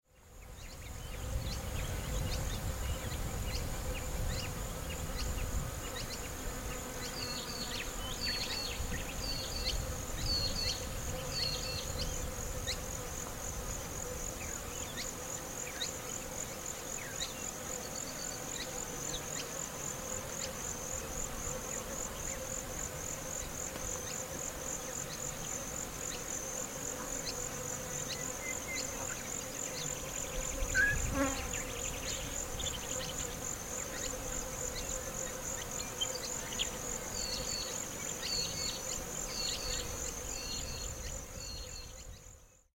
Desert Atmos Post Rain
Recorded on a hot october day moments after a storm had past through.
atmos, atmosphere, australia